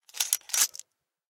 slide big 3
Studio recordings of handling a small metal mechanical device for foley purposes.
Originally used to foley handling sounds of a tattoo machine, but could also be used for guns, surgical instruments etc.
Recorded with an AT-4047/SV large-diaphragm condenser mic.
In this clip, I am sliding back an adjustment screw across a groove while holding a little container of bolts, making the sound a little more massive.
mechanical, clip, handling, small, metal, foley, slide, gun